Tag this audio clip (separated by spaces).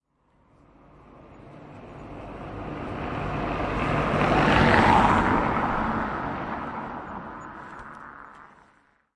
field-recording van car passing tractor bus vehicle engine truck cars traffic driving motor drive city lorry street road